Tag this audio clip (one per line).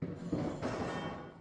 metal
rolling
scaffolding